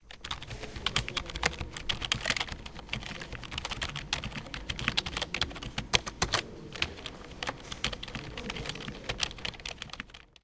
This record was made in the upf poblenou library while somemone was typing a keyboard. The microphone was not really near to the source and that is why the bacjground nioise was also captured by the Edirol R-09 HR portable recorder .
campus-upf, computer, crai, keyboard, library, typing, upf, UPF-CS14